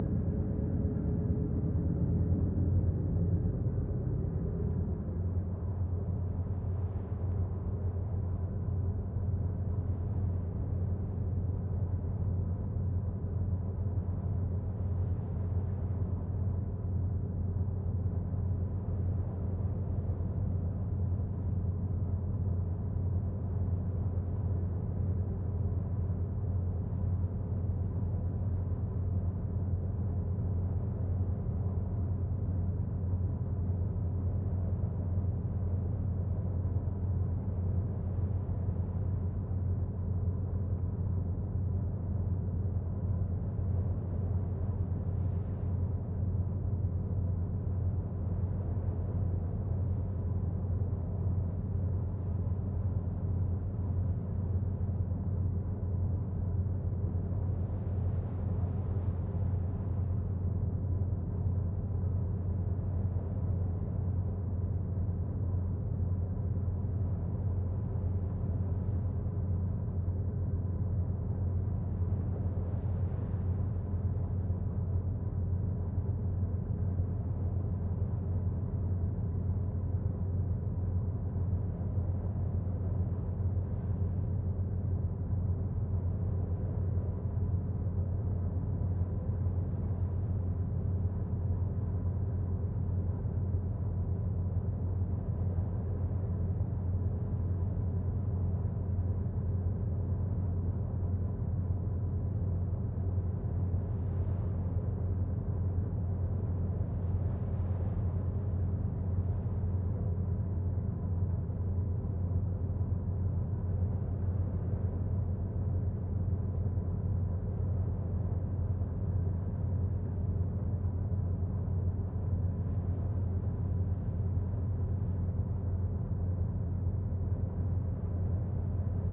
navy bow ship sunset
ambience of a travelng in a mexican navy ship, recorded in the bow at the sunrise very quiet